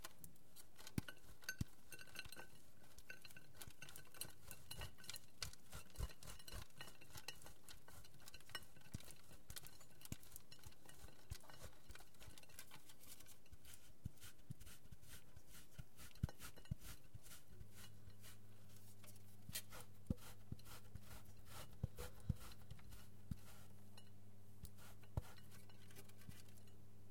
Grating cheese
catering, pack, running, stereo, tap, water